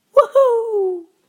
Just me shouting woo hoo and edited pitch with Audacity